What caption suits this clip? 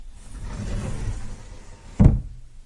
Oshiire is a Japanese closet. The doors of Oshiire are Fusuma.
Fusuma are two slide doors.
wooden, paper, opening, open, closing, door, slide, close